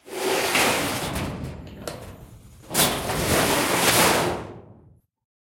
med-metal-scrape-01
Metal rumbles, hits, and scraping sounds. Original sound was a shed door - all pieces of this pack were extracted from sound 264889 by EpicWizard.
metal, shield, blacksmith, rumble, industrial, shiny, impact, percussion, bell, iron, factory, clang, ting, pipe, lock, industry, metallic